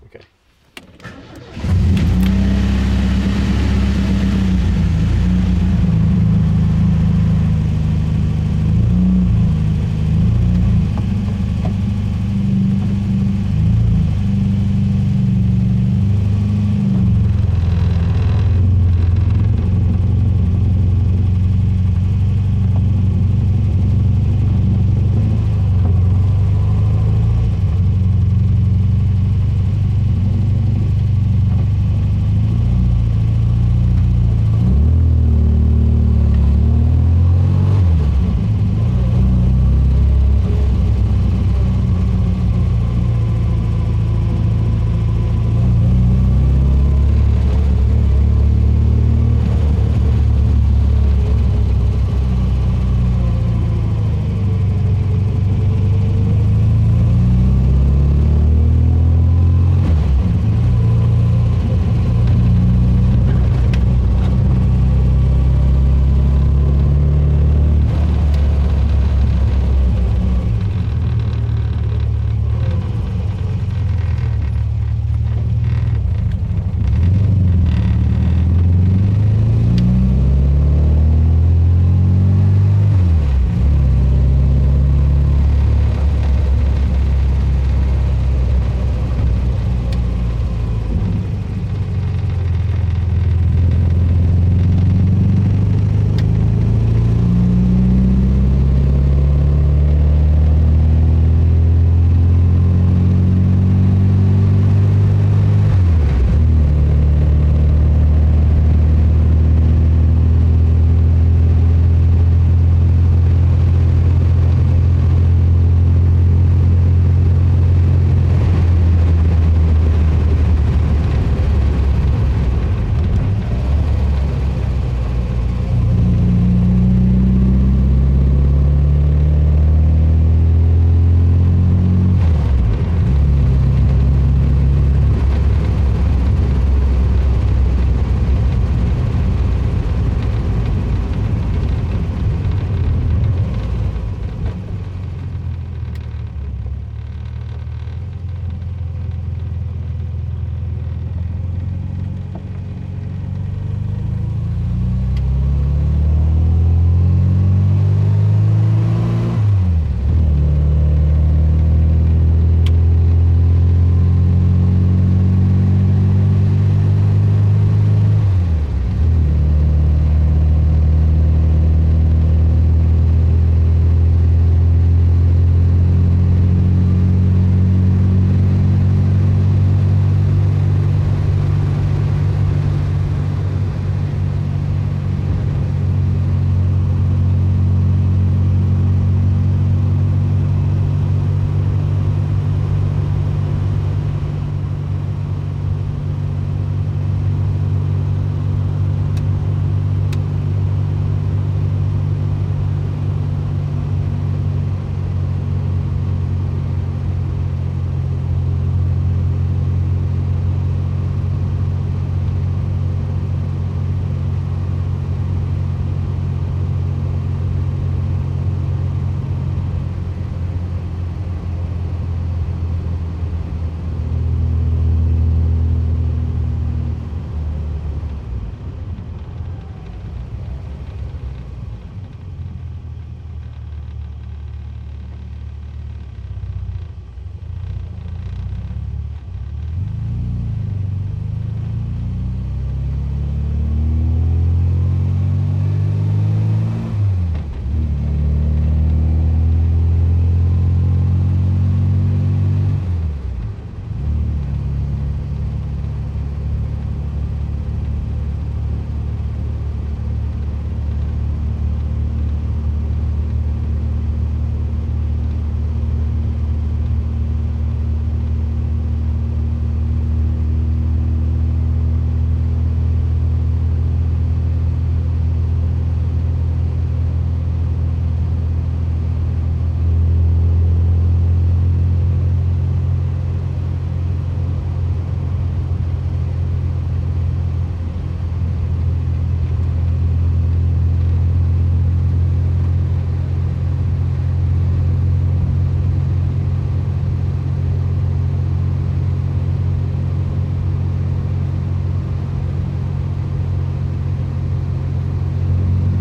Car start and drive interior vintage MG convertable
Vintage 1970's MG convertible